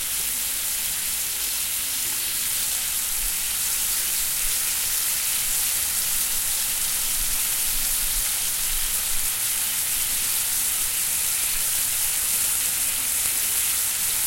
frying pan frying a steak 1
frying pan frying a steak
raining, raindrops, rain